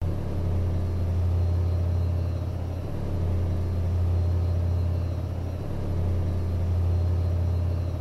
Original 3s field recording pitch-shifted to remove pitch variation due to change in spin speed. Then three concatenated with fade-in/fade-out to create longer file. Acoustics Research Centre University of Salford